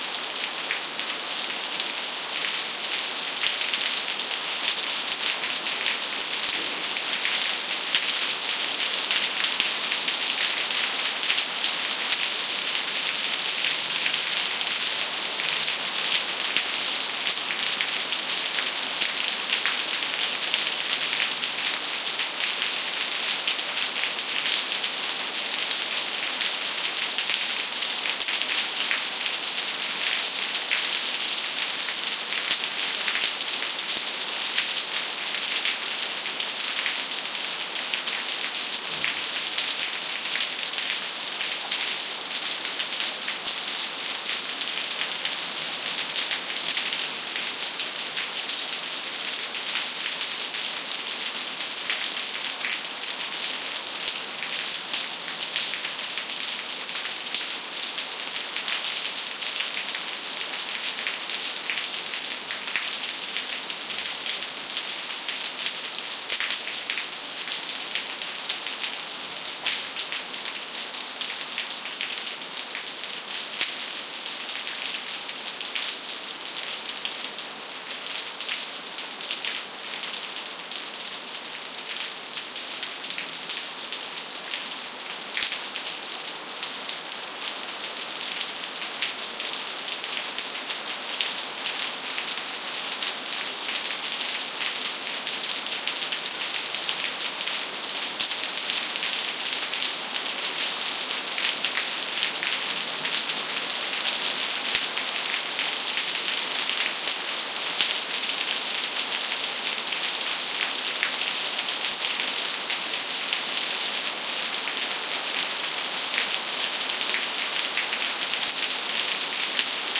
20151101 just.hard.rain
Raindrops falling on pavement. Primo EM172 capsules inside widscreens, FEL Microphone Amplifier BMA2, PCM-M10 recorder. Recorded at Sanlucar de Barrameda (Andalucia, S Spain)
thunderstorm, storm, south-spain, field-recording, rain, nature, thunder